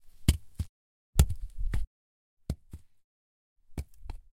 impact the log on the ground